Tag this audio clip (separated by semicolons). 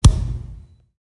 concrete; concrete-wall; concretewall; crack; fist; hand; hit; hits; human; kick; knuckle; pop; slam; slap; smack; thump